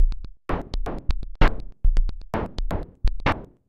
Drumloops with heavy effects on it, somewhat IDMish. 130 BPM, but also sounds good played in other speeds. Slicing in ReCycle or some other slicer can also give interesting results.
beats, drumloops, processed